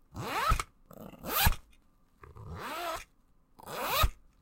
Zip or Zipper noises
Some simple zipper noises
briefcase, clothing, jacket, suitcase, zip, zipper, zippers, zips